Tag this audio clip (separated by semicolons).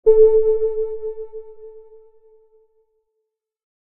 button; game; interface-button; Sound-FX; video-game; video-game-button; website-button